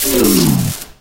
Connum's Electric_sparks and wildweasel's DSGETPOW join forces! :D
electric, power-loss, deactivate, robot, shutdown, power-down, crackle